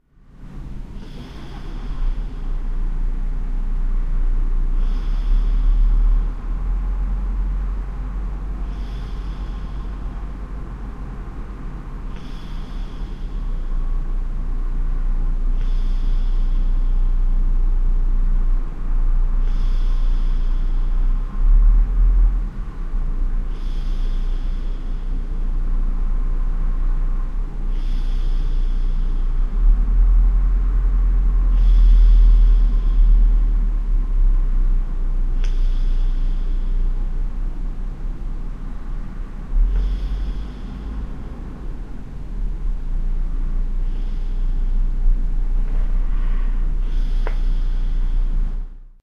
Next to my house you'll find a distribution centre of the dutch mail (TPG-Post). A truck is loaded and leaving there. This happens several times during weeknights. I am sleeping on the third floor where I switched on my Edirol-R09 when I went to bed.
street-noise, field-recording, human, breath, nature, body, street, noise, household, bed, engine, traffic